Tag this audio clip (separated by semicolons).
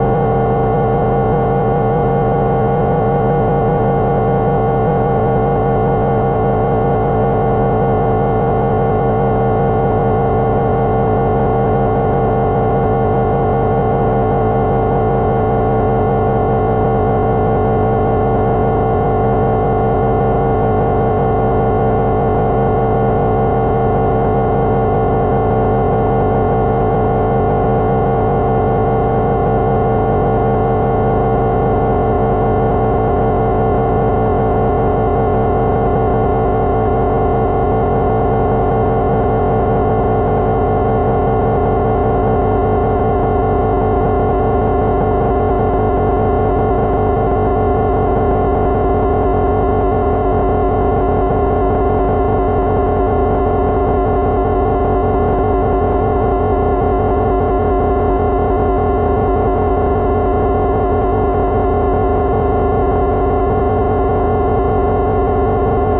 abstract; atmosphere; drone; eurorack; experimental; game-design; modular-synth; noise; synthesised